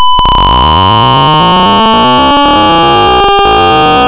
Generated using the following C program:
main(t){for(;;t++)putchar(
(t>>2)*(99999&t;>>10)
howl, weird, otherworldly, wail, unnatural